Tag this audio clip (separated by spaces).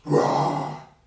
Foley sounds